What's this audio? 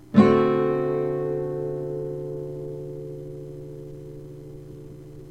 used TAB: 2120xx(eBGDAE)
acoustic, d7